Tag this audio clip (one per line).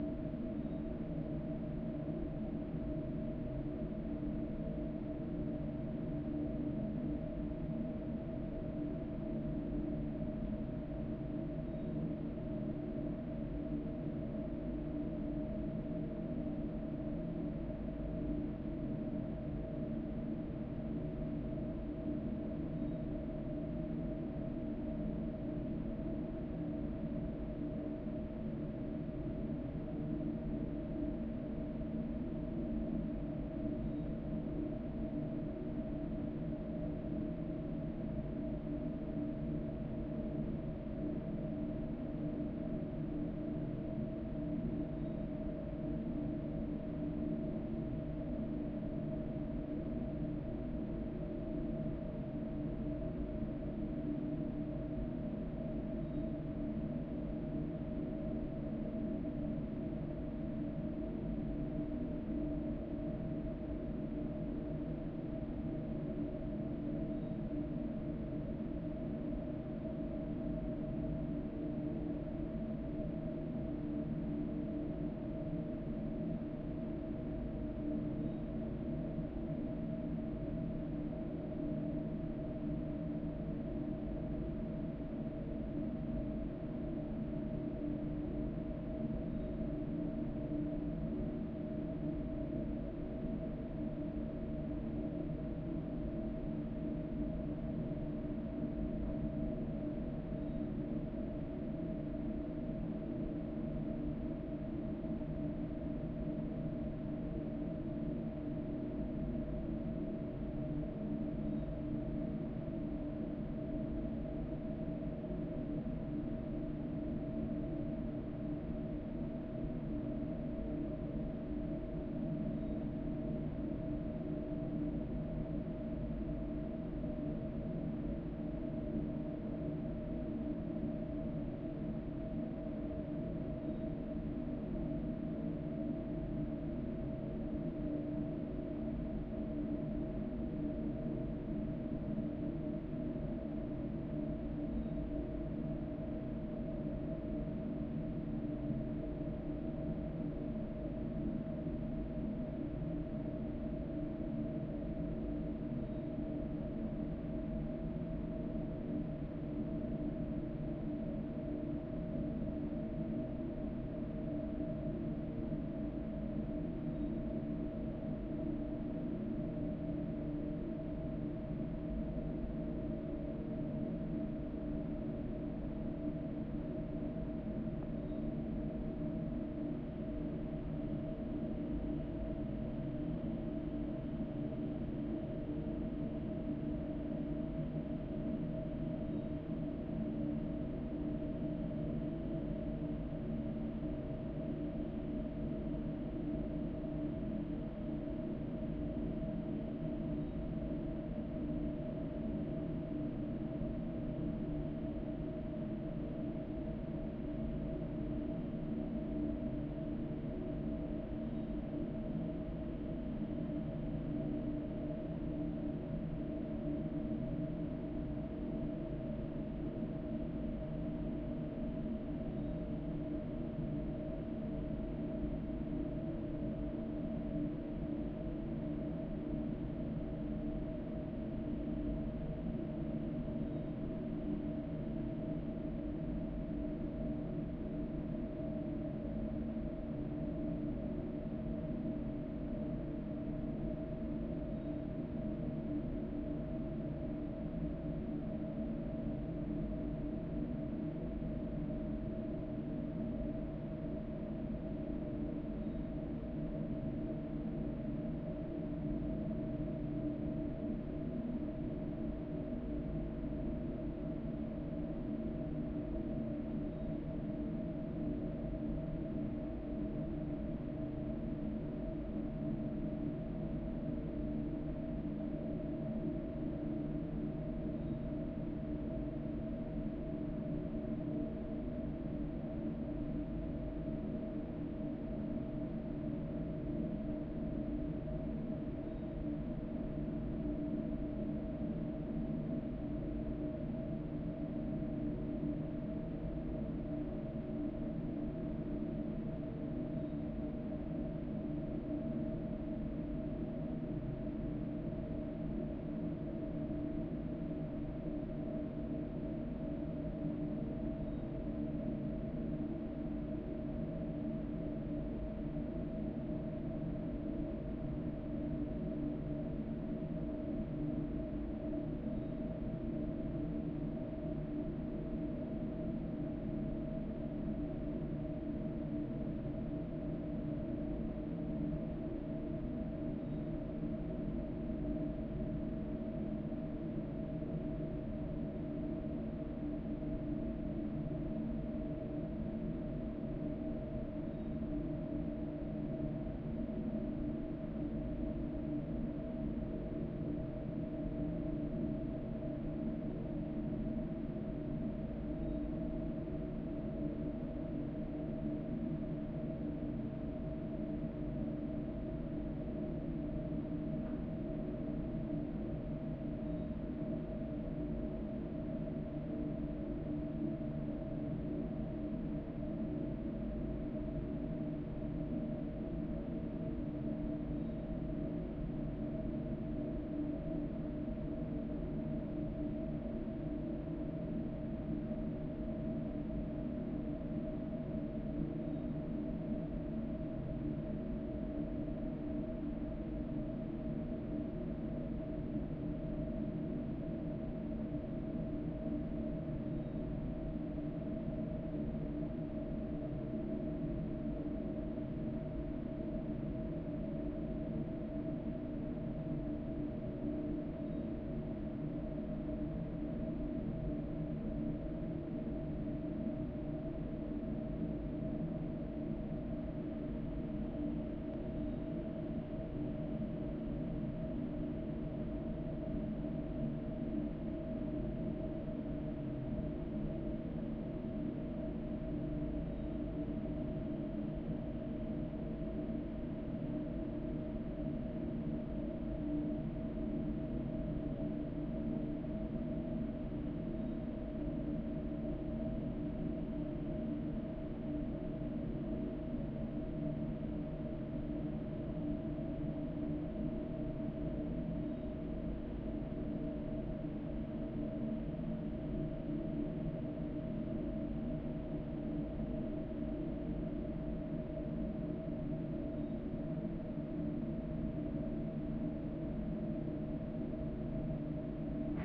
building
extractor
fan
hum
indoors
large-room
office
room
room-noise
room-tone
roomtone
tone